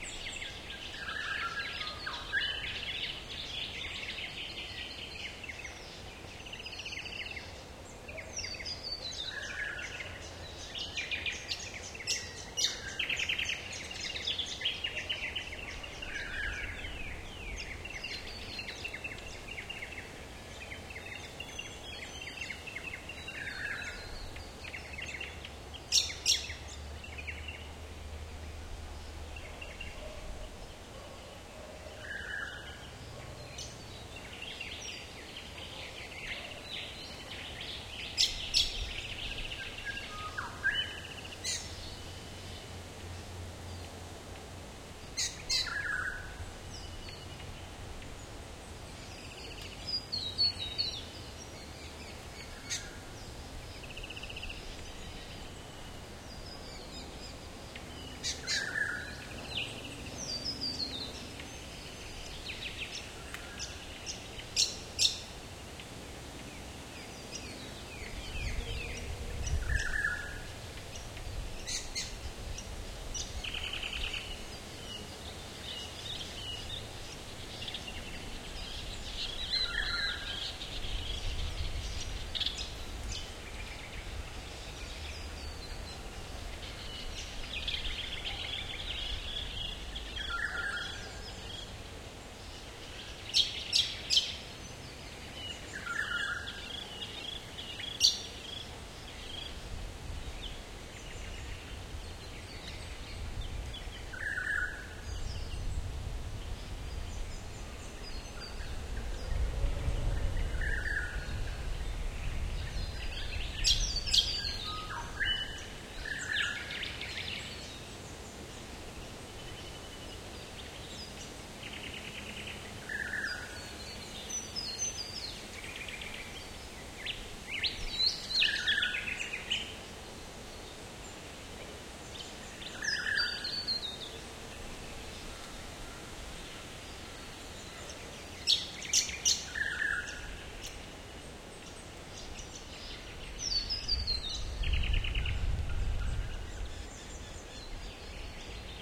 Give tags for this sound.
wind; field-recording; ambience; forest; trees; ambient; nature

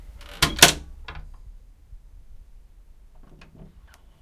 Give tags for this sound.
closing; door; living-room

